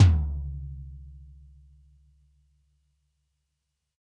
Dirty Tony's Tom 16'' 060
This is the Dirty Tony's Tom 16''. He recorded it at Johnny's studio, the only studio with a hole in the wall! It has been recorded with four mics, and this is the mix of all!
16, dirty, drum, drumset, kit, pack, punk, raw, real, realistic, set, tom, tonys